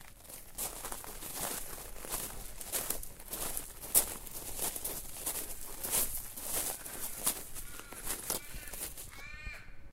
walking on gravel, recorded from front of person walking